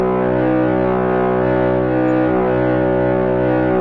Synthesized aircraft
A plane sound I created from my voice.